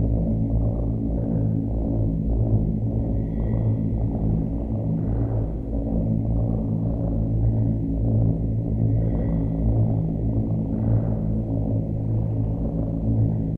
kerri-cat1o-mix-loopable
This is fully loopable version of it (no fade in/out needed). Additionally - it was remixed with the same sound, but swaped (and shifted channels), to make the sound more centered/balanced. The sound is 1 octave higher than the original.
texture, cat, animal, remix, purr